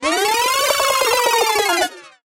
Tape Rewind #1
This is a tape rewind sound, made by taking a short snippet of music, messing around with the speed of the audio (audacity "sliding stretch", to be specific) and then speeding it up to sound like those stock sound effects of "tape rewinds" (looking at you, sound ideas 30 years ago in the early 90s.)
cassette, forward, pitch, recorder, rewind, shift, tape, tape-rewind